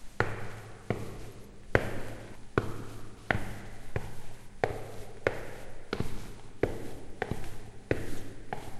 Walking up a stairwell in a hollow stairwell.
footsteps, walk, marble, steps, stereo, cloth, reverb, stairs